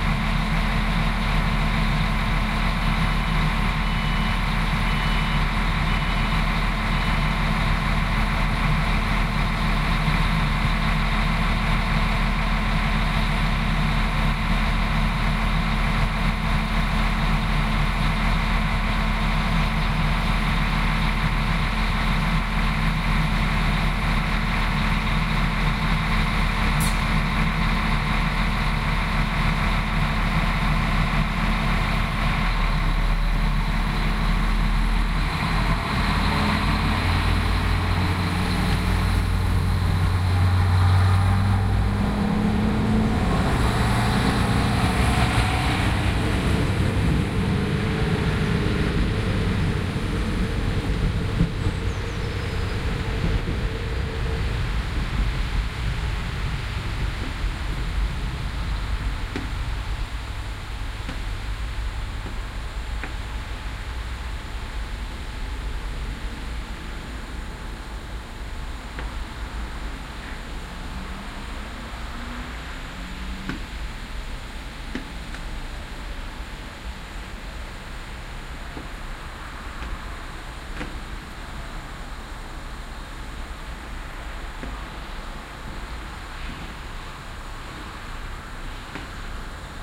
train leaving varde
At the trainstation in Varde, Denmark. Waiting for the train to departure. The train is a CORADIA LINT 41 which has been driving since 2000 in jutland, Denmark.
Recorded with a Sony HI-MD walkman MZ-NH1 minidisc recorder and a pair of binaural microphones. Edited in Audacity 1.3.9.
denmark, trainstation, varde, train